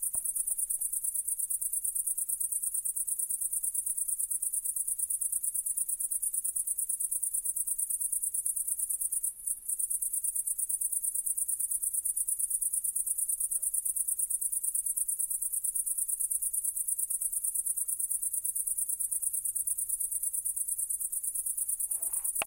Crickets recorded at night. 88.1KHz 16bits, recorded with Edirol R-09HR here in S/W of France.